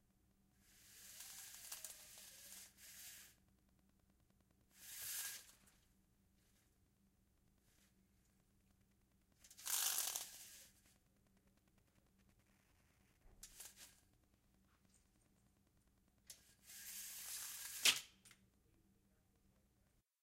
Window blinds being raised and lowered twice.